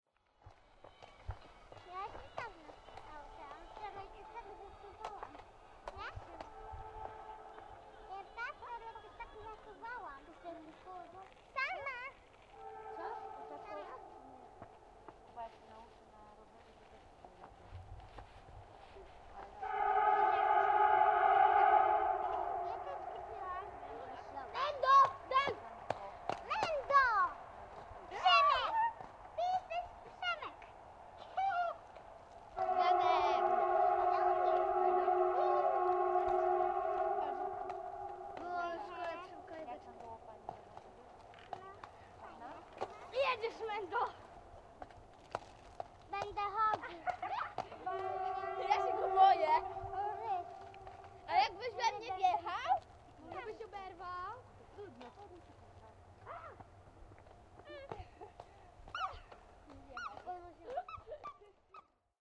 08092014 Torzym train sound
Fieldrecording made during field pilot reseach (Moving modernization
project conducted in the Department of Ethnology and Cultural
Anthropology at Adam Mickiewicz University in Poznan by Agata Stanisz
and Waldemar Kuligowski). Ambience of
the center of Torzym (Lubusz) near of the national road no. 92. In the background the sound of passing by train.
Recordist: Robert Rydzewski. Editor: Agata Stanisz. Recorder:
Zoom h4n with shotgun.
train, lubusz, traffic, fieldrecording